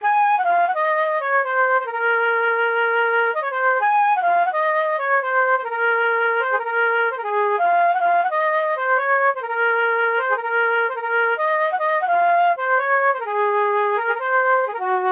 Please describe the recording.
Who is Dababy (Flute) - 127 BPM A#m
A bouncy Dababy type flute for beats like Jetson made.